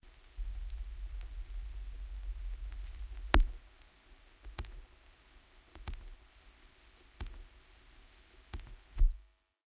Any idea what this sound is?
Vinyl Runout Groove 05
Run out groove of a 7" Single @ 45 RPM.
Recording Chain:
Pro-Ject Primary turntable with an Ortofon OM 5E cartridge
→ Onkyo stereo amplifier
→ Behringer UCA202 audio interface
→ Laptop using Audacity
Notched out some motor noise and selectively eliminated or lessened some other noises for aesthetic reasons.
45RPM, 7, analogue, crackle, hiss, noise, record, record-player, retro, run-out-groove, single, stylus, surface-noise, turntable, vintage, vinyl